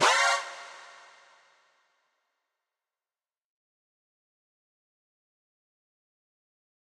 A recreation of the typical MGS detected lead.
alert, detected, gear, Metal, solid